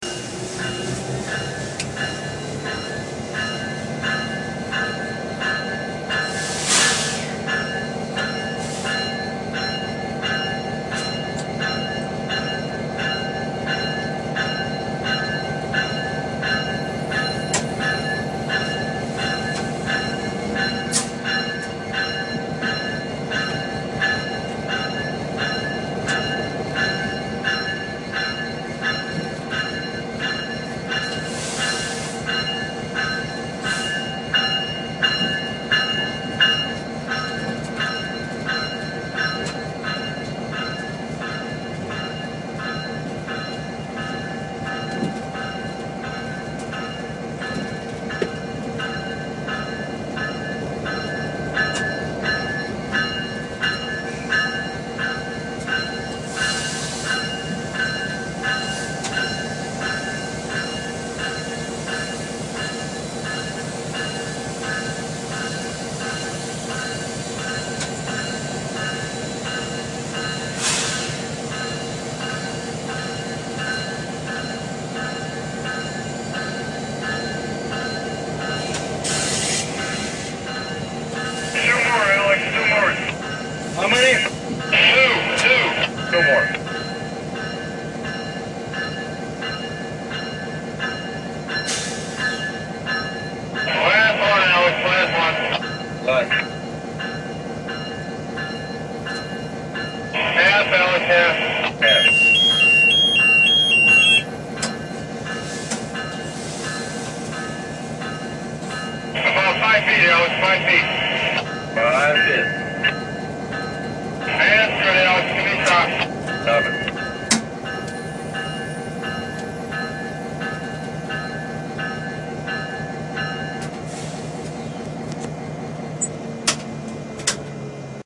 sound for moving a GE locomotive from inside the cab
GE locomotive moving bell radio